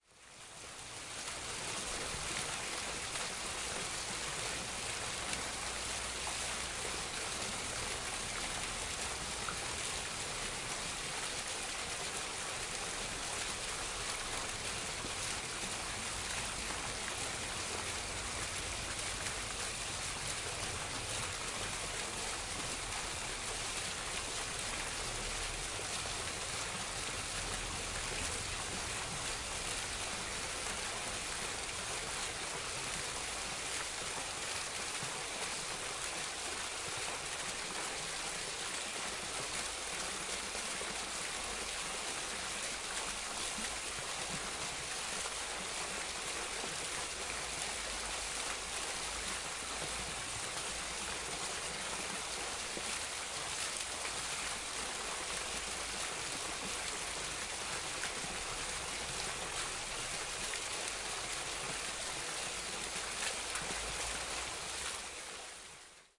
The sound of a fountain near the Town Hall in Ronda (Málaga, Spain). Recorded in a quiet Sunday morning with a Zoom H4N.
El sonido de una fuente cerca del Ayuntamiento de Ronda (Málaga, España). Grabado una tranquila mañana de domingo con una Zoom H4N.